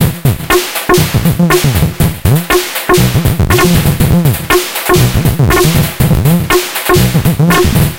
Aerobic Loop -24
A four bar four on the floor electronic drumloop at 120 BPM created with the Aerobic ensemble within Reaktor 5 from Native Instruments. Very weird electro loop. Normalised and mastered using several plugins within Cubase SX.